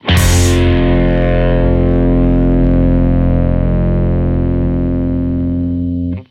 Guitar power chord + bass + kick + cymbal hit